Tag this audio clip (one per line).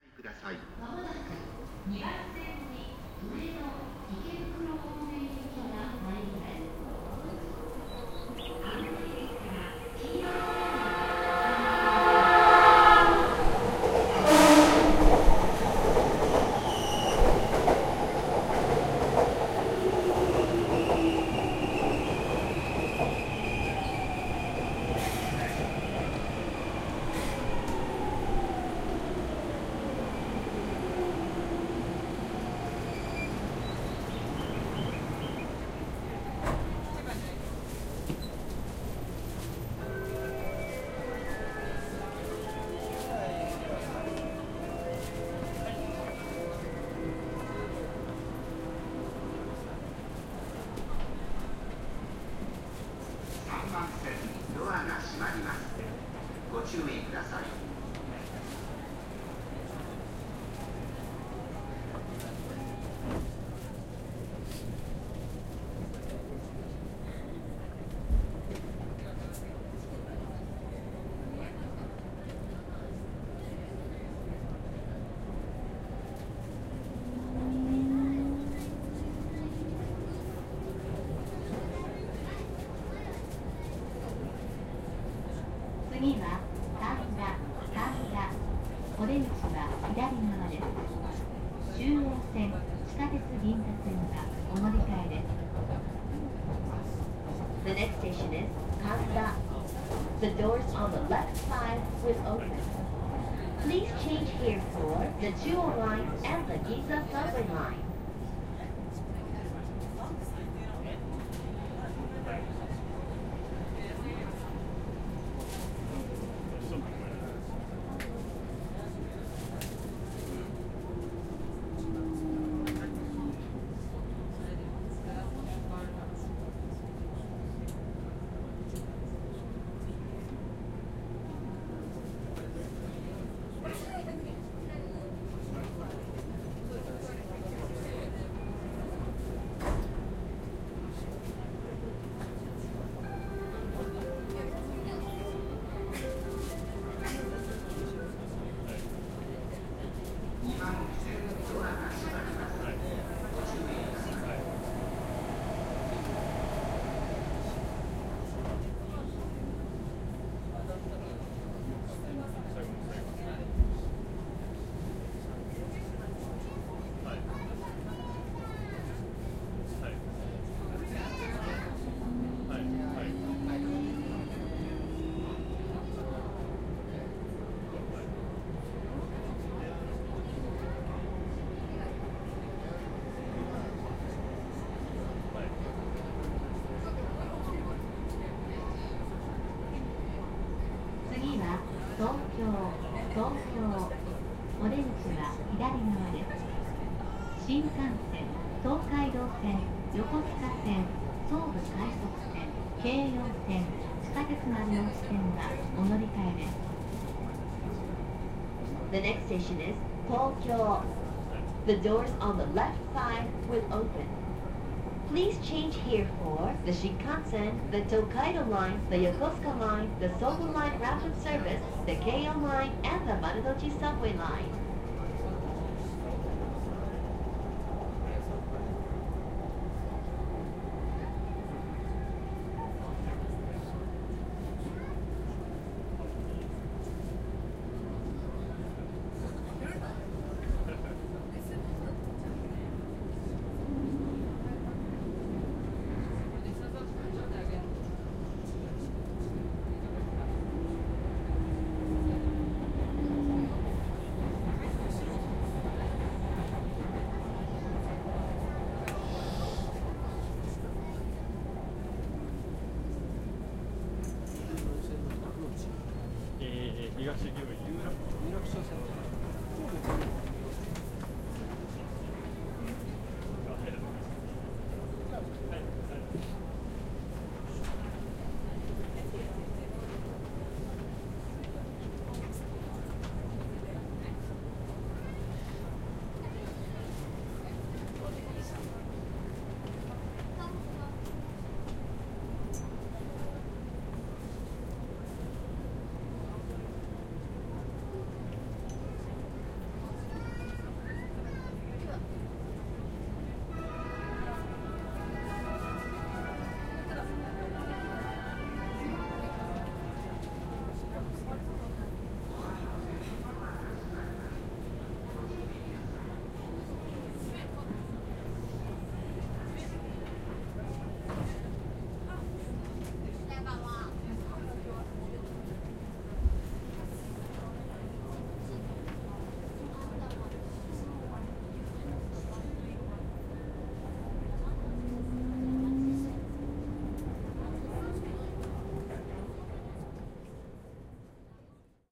japan
field-recording
subway
tokyo